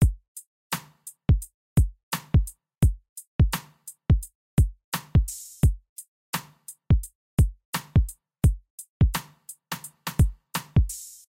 Hip-Hop Drum Loop - 171bpm
Hip-hop drum loop at 171bpm